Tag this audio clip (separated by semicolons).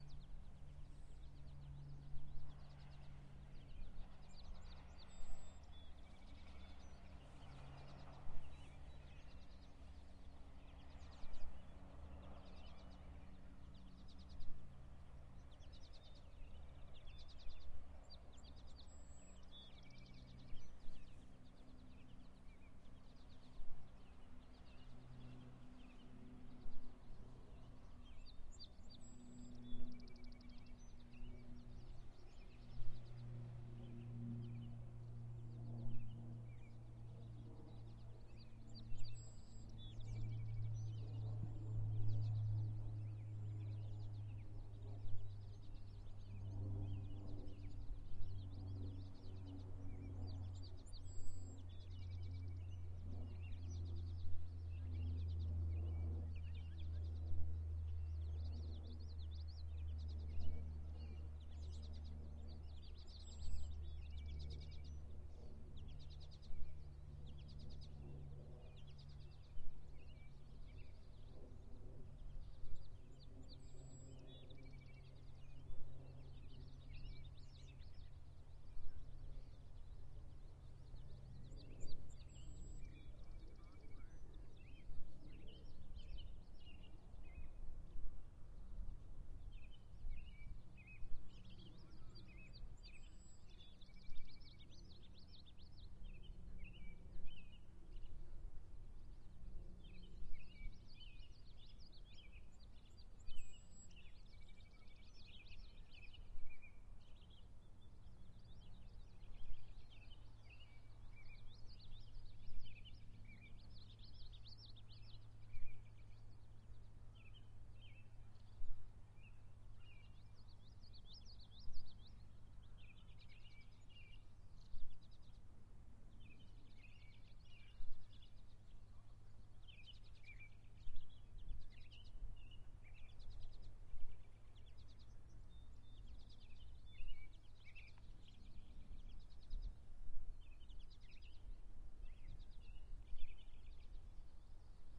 birds; voices; maine; h4n; ocean; ambient; island; nature; airplane